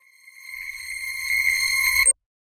cinematic, processed, uplift, high
high, uplift, cinematic, processed